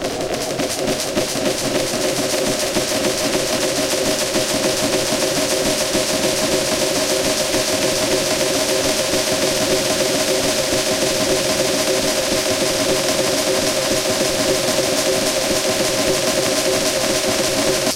up in space, echomania